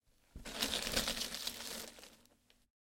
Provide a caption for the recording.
crawling-broken-glass004
Bunch of sounds I made on trying to imitate de sound effects on a (painful) scene of a videogame.